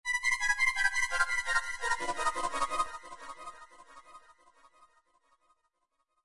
A creepy/sneaky diminished digital short scale created with Pocket Band for Android